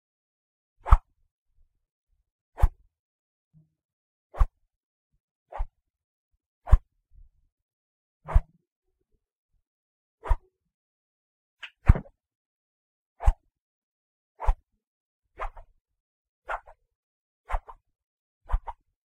Just some basic swishes that I made with a fresh apple tree branch.